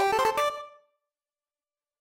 Upgrade SFX
A sound effect used when a player in a video game picks up a particular ability in a video game.
SFX, Video-Game, Pick-Up, Coin, 8-Bit